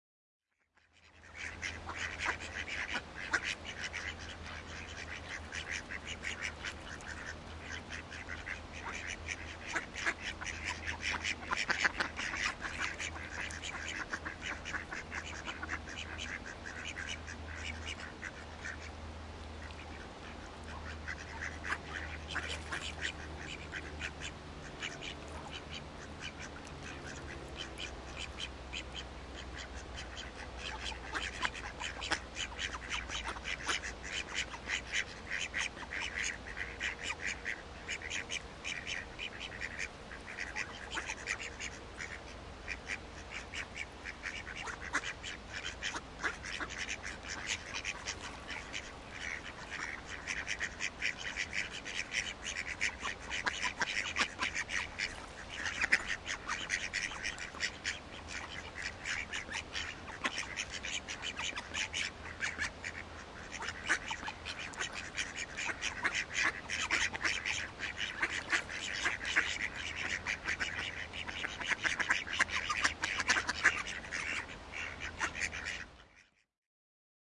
Cackling ducks on the river bank